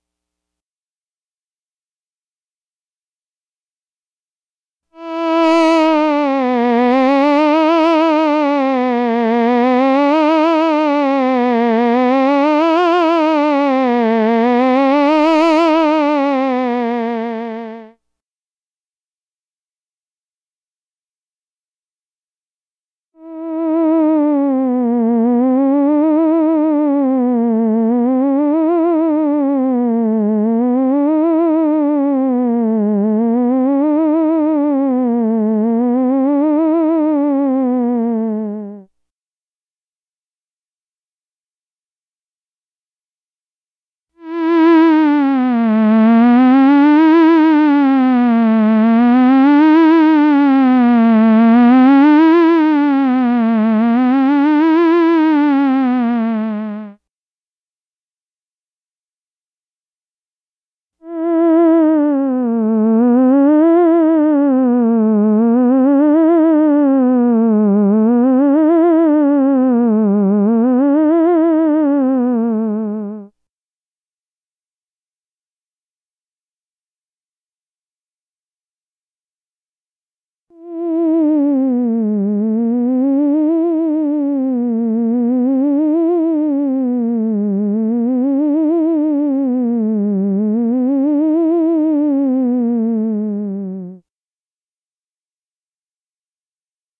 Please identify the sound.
File contains a second collection of 4 or 5 creepy, clichéd "hypno-tones" in the theremin's middle ranges, each separated with 5 seconds of silence. Each hypnotone in the file uses a different waveform/tonal setting to give you various textural choices.
As always, these sounds are recorded "dry" so that you can tweak and tweeze, add effects, overdub and mangle them any way you like.